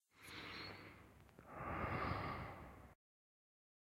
Respiración Suave s
breath, male, Soft